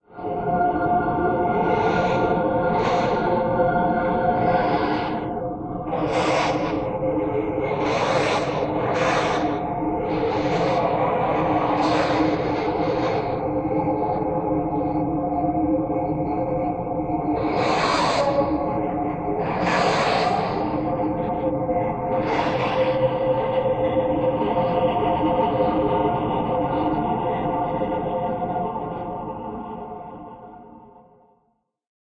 Space monster letting out a few snorts
evil, monster, outer-space, unearthly